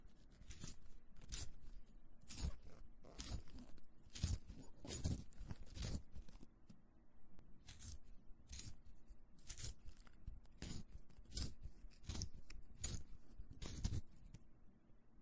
Pencil Sharpener
Sharpening a standard pencil
pencils, sharpener, sharpen, pencil, school